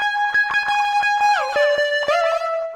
paul t high lead168bpm
high guitar lead